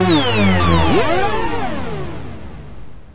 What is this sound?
Generic unspecific arftificial space sound effect that can be used for games e.g. for the beaming something up.
beam, effect, game, hyperstorm, jingle, space